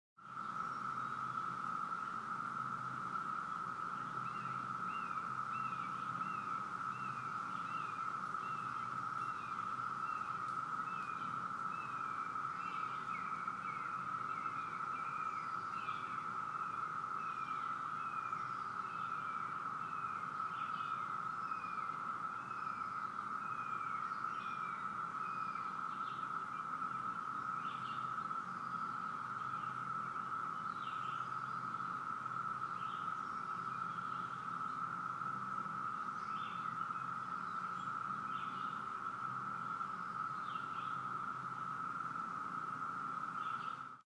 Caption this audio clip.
17 Year Locusts Cicadas in VA 2020
The sound of 17 year cicadas in the Virginia USA woods with bird sounds as well. Recorded May 2020.